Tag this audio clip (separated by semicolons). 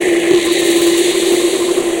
60-bpm; deep; dub; dubspace; loop; space